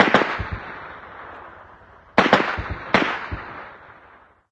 Humvee .50 caliber gun fired repeatedly.